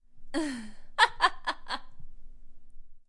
Female Evil Laugh
Me laughing evilly.
female, laughter, insane, cackle, chortle, laugh, woman, voice, laughing, evil, giggle, girl